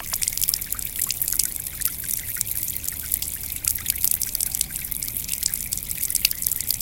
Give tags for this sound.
sonic-snap
Escola-Basica-Gualtar

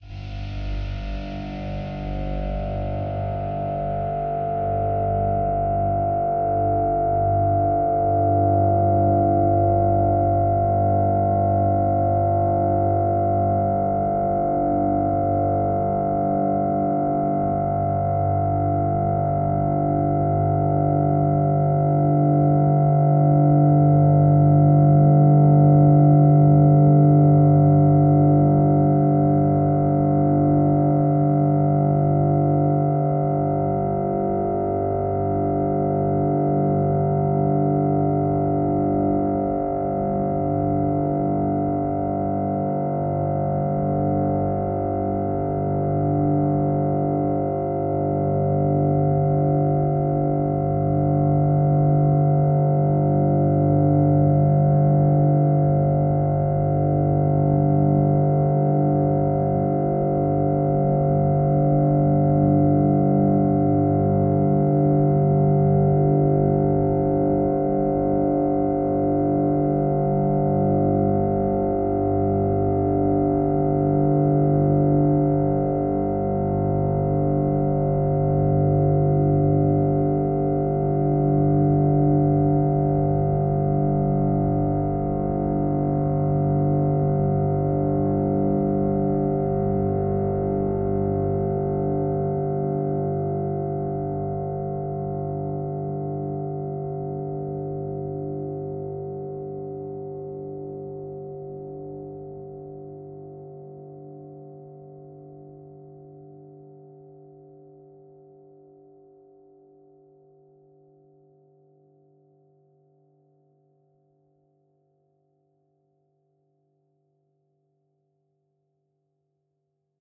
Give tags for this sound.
ambient
multisample
overtones
pad

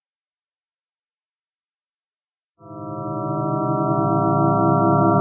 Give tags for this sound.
super-collider
strange
sines
senoidales-aleatorias
sfx
abstract
random
digital
additive-synthesis
sci-fi
sound-design

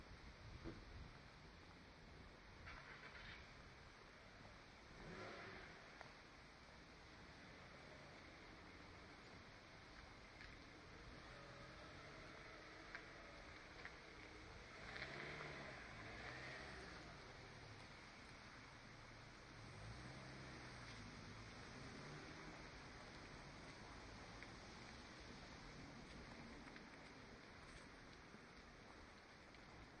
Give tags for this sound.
ambiance
ambience
binaural
c4dm
field-recording
london
qmul
quietstreet

